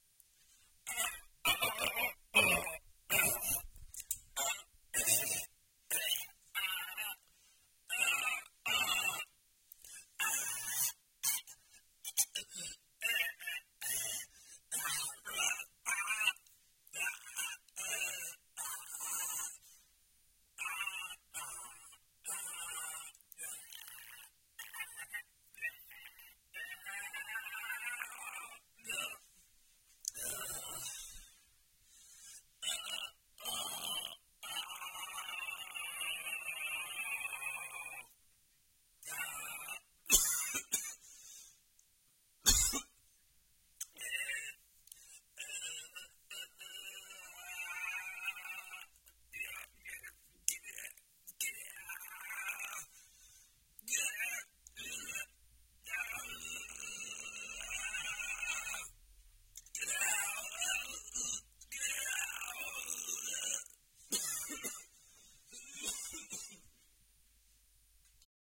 strangle pain
pain, anguish, agony, strangle, grunt, painful